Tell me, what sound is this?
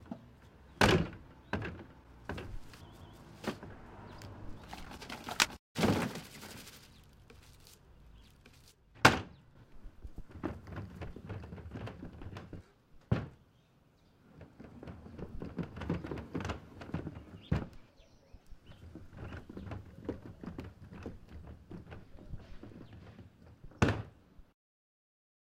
Throwing trash away Opening and closing lid and pulling away
Big trash bin being pulled and throwing trash away in the bigger bin
OWI, Throwing, trash